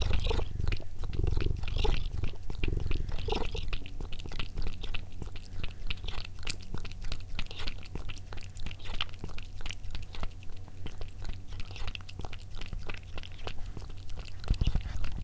cat purr 3
Unusual sound of cat purring